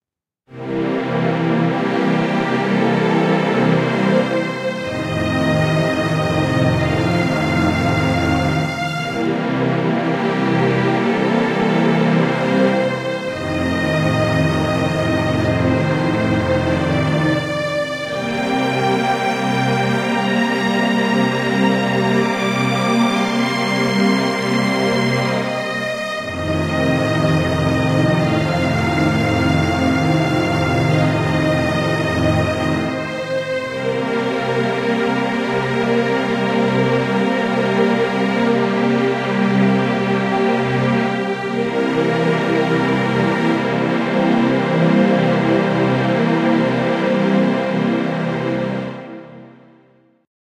Blues Strings in B Flat Major
Made by playing some chords on the keyboard into MAGIX Music Maker's String Ensemble virtual instrument. A sweeping, slow blues sound on orchestral strings.
ambience, atmosphere, blues, C7, cinematic, classical, D7, dramatic, film, instrumental, intro, loop, movie, music, orchestra, orchestral, sample, slow, string-ensemble, Strings, theme, vibrant, violin, warm